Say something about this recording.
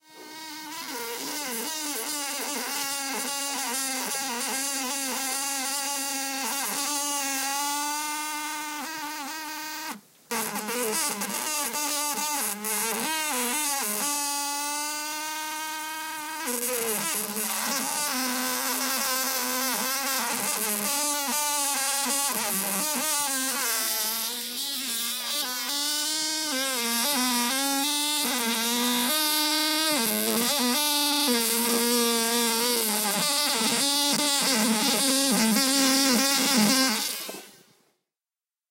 Trapped Fly
A stereo recording of a fly stuck in flypaper, at about 24s I realised that the fly was the other side of the paper and moved the mics. Zoom H2 Front on-board mics. The reason it is so abrupt at the end is that I foolishly decided to get an external mic. My wife told me that it never made another sound as soon as I left the room. Does this count as a field recording ?
buzzing, bzz, wings, zzzz